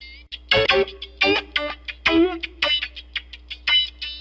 Funk guitar in E 1 bar 114b
Funky guitar loop 114bpm